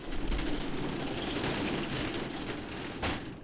Metallic Blind
Closing a metal blind on the street. Sound recorded with ZOOM H4 and the built-in microphone. Date and time of recorded sound: 2012-01-13 19:15
blindmetallic-sound, industrial, mechanical-noise, metallic, stora, street, warehouse